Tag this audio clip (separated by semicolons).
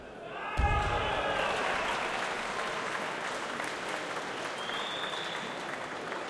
applause; wrestling; cheers; stadium; sport